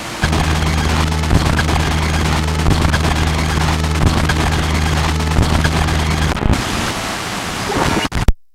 low hum chaos machine
Casio CA110 circuit bent and fed into mic input on Mac. Trimmed with Audacity. No effects.
Bent, Casio, Circuit, Hooter, Table